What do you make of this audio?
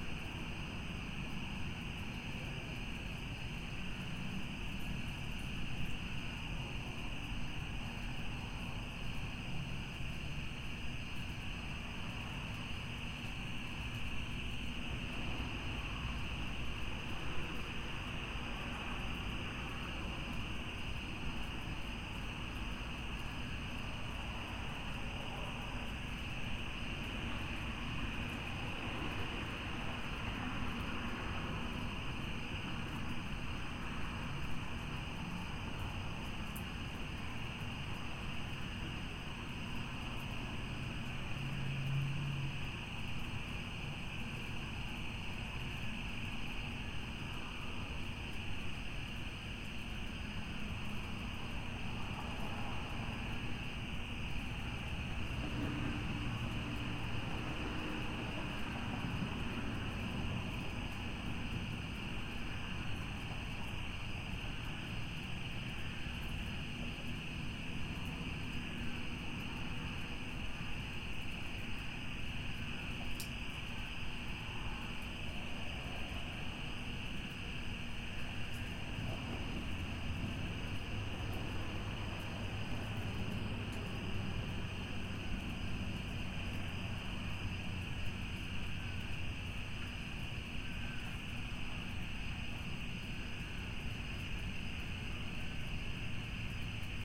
Country Porch Crickets
Crickets at night with cars on road in distance. Recorded from my back porch using AT2020 mic, Conexant HD Audio System, processed on Audacity. No effects used.
ambiance, background, bugs, country, crickets, evening, nature, night, peaceful, porch, quiet, soft